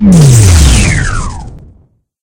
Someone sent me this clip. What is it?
Robot death2
explosion, electric, Robot, shock, alien